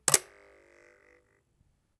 Recorded knifes blades sound.
blade, blades-sound, click, field-recording, glitch, high, knife, percussion, recording, shot, sound, vibration